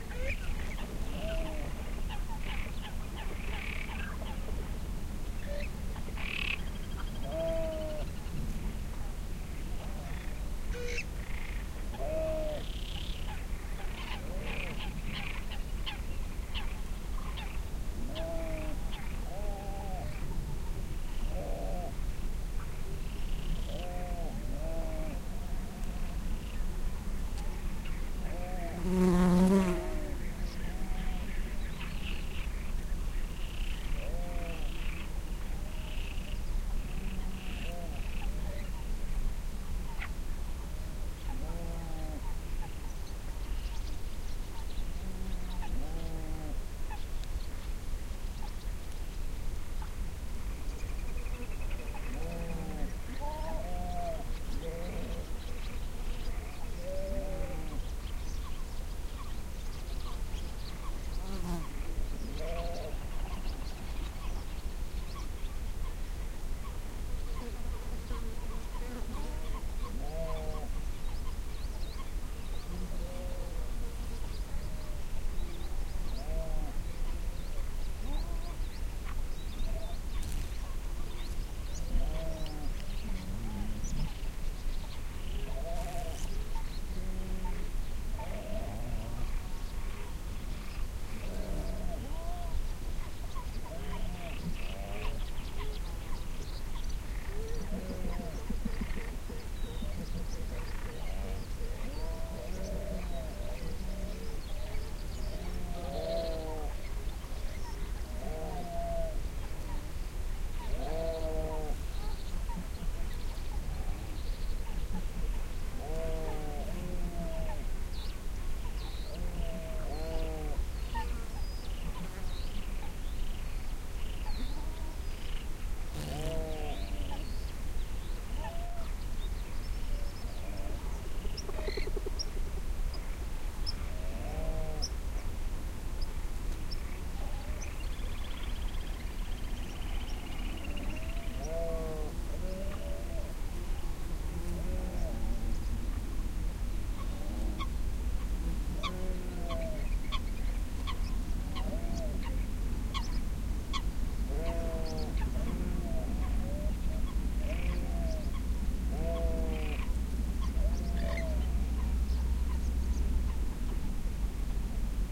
20110320 spring.marsh.atmosphere.03
distant marsh ambiance with frog and bird calls + insects buzzing + bleating sheep. Recorded at the Donana marshes, S Spain. Shure WL183, Fel BMA2 preamp, PCM M10 recorder
ambiance, birds, donana, field-recording, frogs, marshes, south-spain